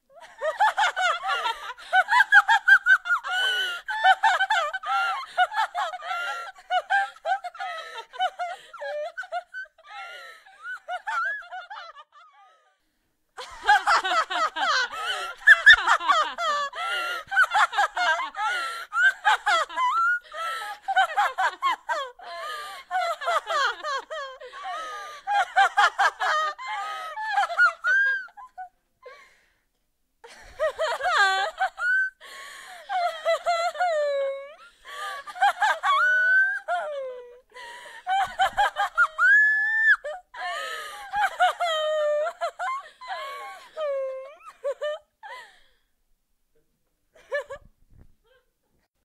succubus laughter raw
Raw recording of evil succubus laughter, without the layering or reverb
EDIT: I see a lot of people are enjoying this sound!
woman, laughing, evil, cackle, female, laugh, women, laughter